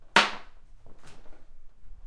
wood plastic metal hit maybe broom stick handle roomy
broom, handle, metal, roomy